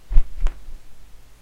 Some fight sounds I made...
combat, fight, fighting, fist, hit, kick, leg, punch